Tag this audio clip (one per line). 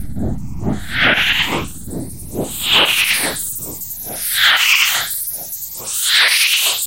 EQ
Noise
Trance
AmbientPsychedelic
Dance
Space
FX
Psytrance
Sci-fi
Processed